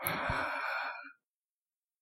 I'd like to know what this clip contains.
Male voice exhaling